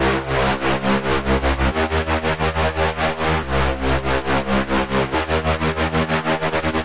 A dubstep wobble bass drop loop
Made with GMS (Groove Machine Synth)
In Fl Studio